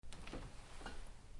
Fridge Door opening at medium distance.
Door, Fridge, Open